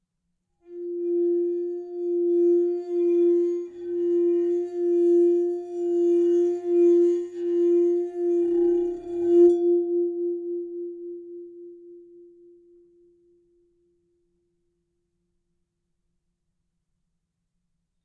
The sound my finger makes when I slide through the edge of a wine cup with some water in it.